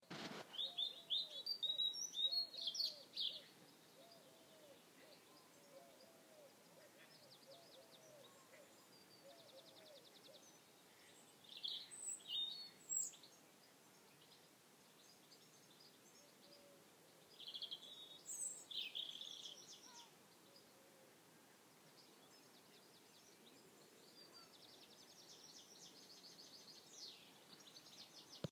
Evening Chorus
evening birdsong chorus in a wooded area on the west coast of Ireland, Connemara, Co. Galway.
chorus, sunset